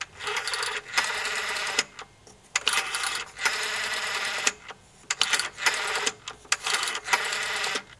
old phone
Selecting numbers with a rotary telephone.